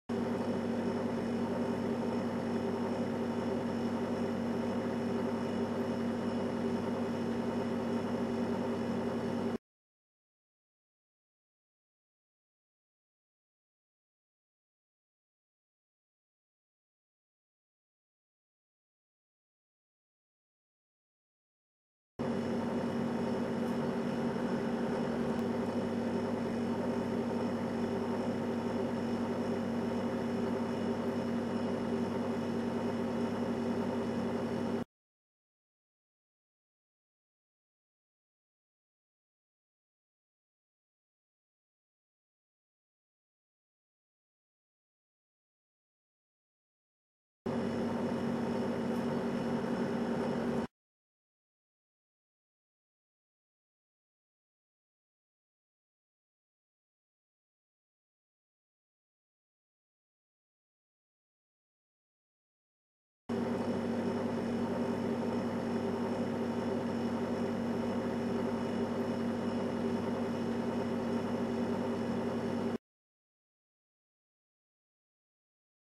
Fridge Hum
The hum of the fridge. Recorded with CanonLegria.